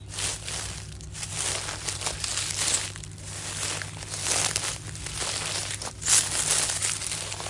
Walking on Dry Leaves
This is a sound of dry leaves being crushed by a person stepping on them. This sound is very versatile and can be used in different situations.
dry, twigs